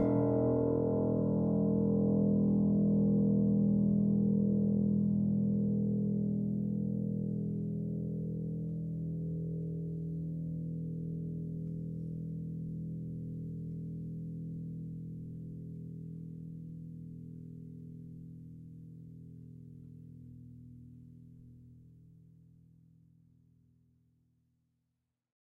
a multisample pack of piano strings played with a finger
piano, strings, multi, fingered